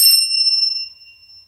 21st chime in Mark Tree with 23 chimes
barchime, chime, marktree